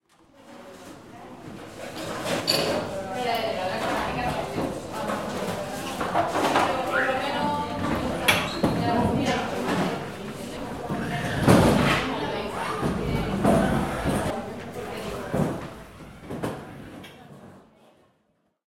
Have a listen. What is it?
paisaje-sonoro-uem cafeteria ambiente 2
Paisaje sonoro del Campus de la Universidad Europea de Madrid.
European University of Madrid campus soundscape.
Sound of the cafeteria
Sonido de la cafeteria
paisaje-sonoro, Universidad-Europea-de-Madrid, UEM, soundscape